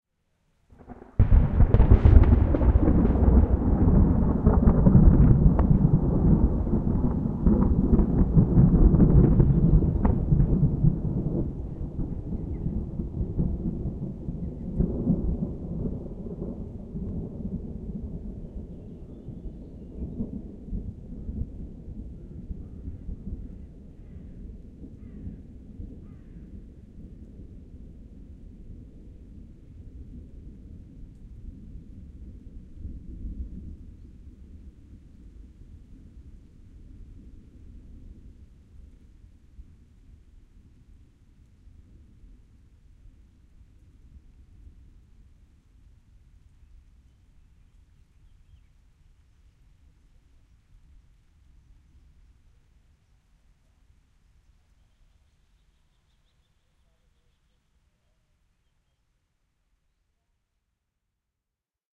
Heavy Thunder Strike - no Rain - QUADRO
4-channel HQ record of a sudden and heavy thunderstrike (nearly) without rain in the afternoon of a hot summerday.
The recording equipment was prepared for recording thunder, so there is no distortion, no compression or (auto)gain reduction done by a limiter in the Zoom F8, means the whole dynamic is still there and will be audible by a adequate monitor/audio equipment.
The sound was not edited or otherwise enhanced with effects.
This file can be used as a intro followed by my other track (link below), which has soft rain at the beginning, before the thunder comes... ;-
The 4 channels are recorded in IRT-cross technique (Microphone distance 25cm) so it is a 360° (4x90°) record.
Used recording gear:
ZOOM F8
4 x Rode NT1 (without "A" = the black ones)
CH1 = FL
CH2 = FR
CH3 = RL
CH4 = RR
The Download-file is a PolyWAV.
If you need to split the file (e.g. to make a stereo file), you can use the easy to use
from Sound Devices for example.
Comments about this atmo-record are welcome!
Thunder, Fieldrecording, clap, IRT-cross, 4-channel, rolling-Thunder, ATMO, Thunderclap, no-Rain, Field-recording, Thunderstrike, Nature, Ambiance